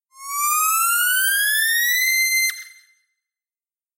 Synth Gliss, A
A very quick and dirty octave glissando made using a synthesizer as requested.
An example of how you might credit is by putting this in the description/credits:
Originally created on 7th December 2016 using the "Massive" synthesizer and Cubase.
alarm, glissando, synthesiser